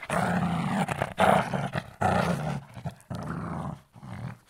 Dog Shih Tzu Growling 05
Shih Tzu dog, growling
Animal, Dog, Grumbling, Snarl, Snarling